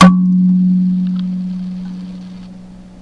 A single note from a thumb piano with a large wooden resonator.